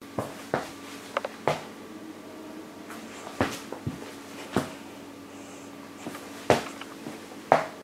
Standing up from the floor
A sound effect of getting up off the floor
feet, floor, foot, footsteps, getting, shoe, shoes, stand, standing, step, up